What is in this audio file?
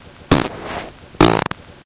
fart poot gas flatulence flatulation explosion